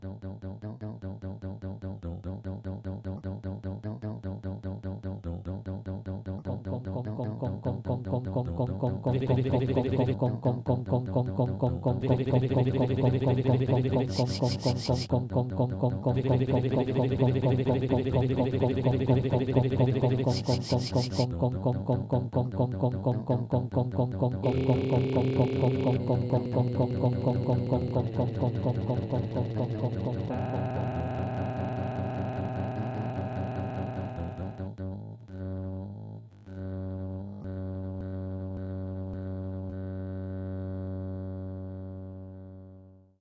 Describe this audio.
06-permanent.summer.officially.announced
an attempt at making 'music' using vocal elements only.
Title makes reference to a serendipitous mix of words appearing in that file